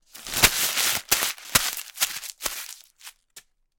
A sheet of paper is scrunched up into a ball in this sound recording
ball, crumpled, paper, scrunched, wastepaper